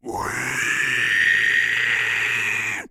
Toni-PigSqueal
Pig Squealing recorded by Toni
pig, voice